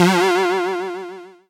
Cartoon, Stunned 02
cartoon, stun, colossus, stunned, nostalgia, character, hit, nostalgic, rpg